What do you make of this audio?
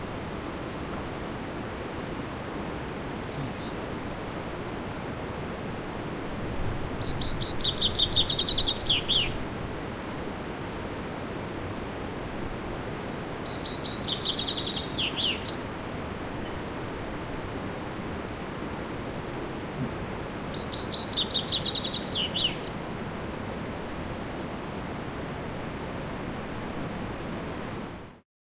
Recorded during a hike in the Pyrénees in France (summer 2016).
Fieldrecording, Bird, Chaffinch, Mountain